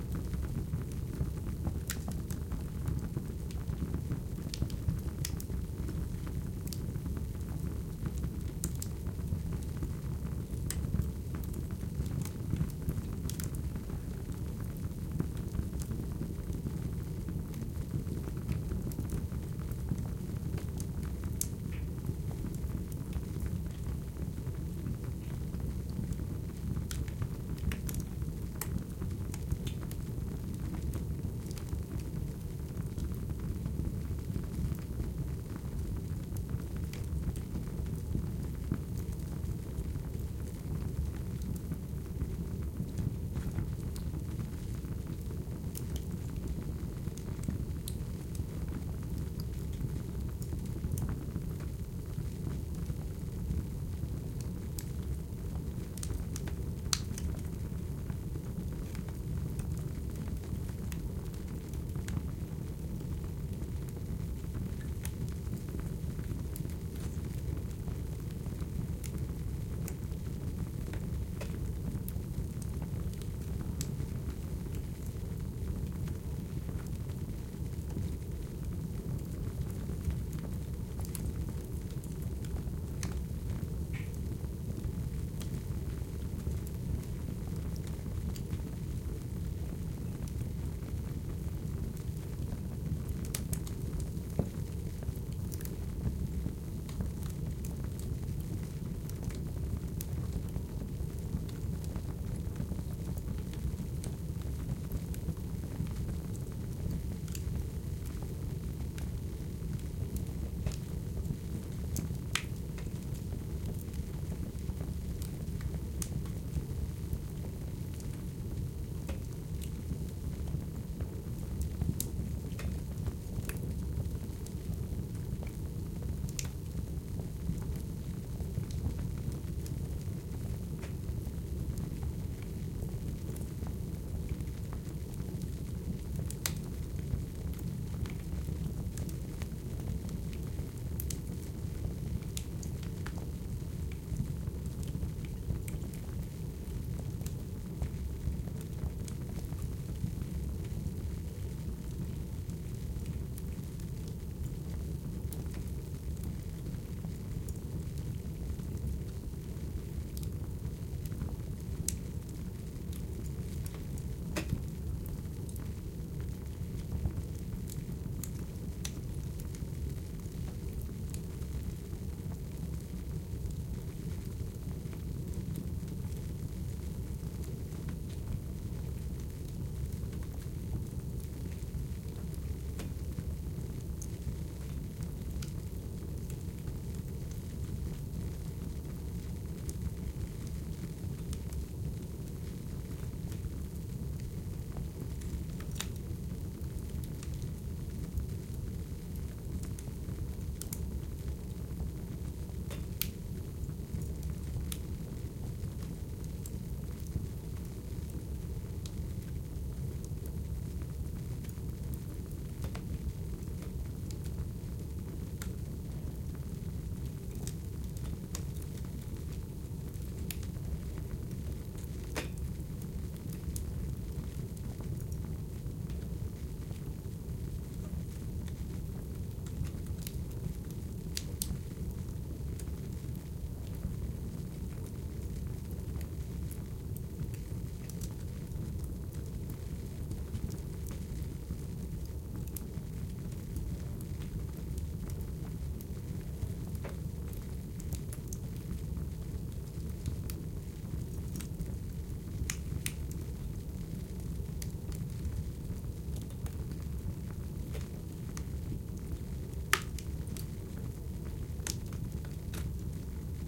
Another recording of our fireplace, this time using two Sennheiser MKH60 microphones into Oade FR2-le recorder.
fireplace, fire